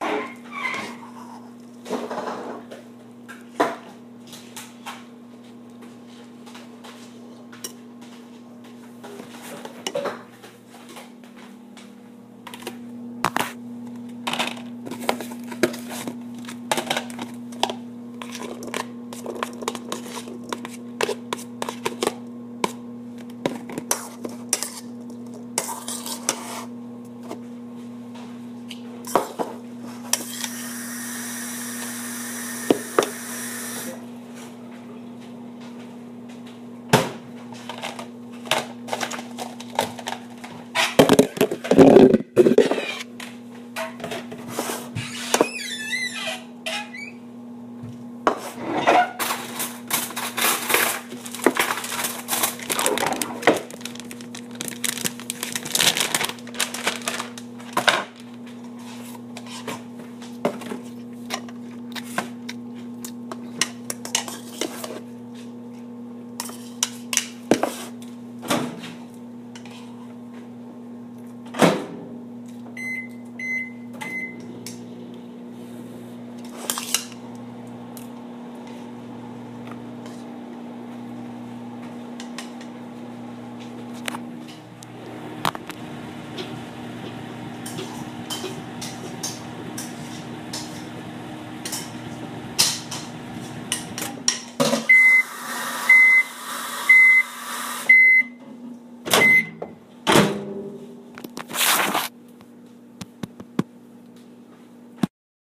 Willis Kitchen Sounds
Noises from the kitchen of an old college house.